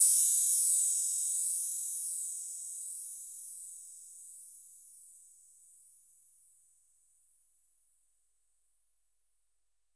ss-purity crash
A high ringing percussion accent - very electronic
crash, electronic